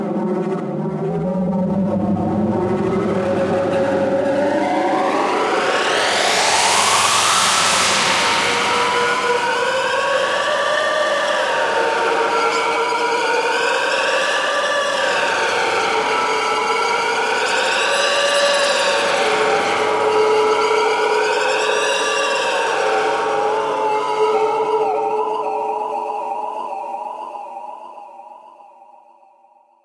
This was made with plate 140 reverbs, neve 1073 EQs, parallel processing a recording of a Triton being played into a Roland Space Echo.
uad, atmos, echo, scape, ambient, space